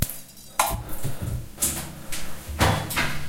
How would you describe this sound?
These samples were made with my H4N or my Samsung Galaxy SII.
I used a Zoom H4N mobile recorder as hardware, as well as Audacity 2.0 as Software. The samples were taken from my surroundings. I wrote the time in the tracknames itself. Everything was recorded in Ingolstadt.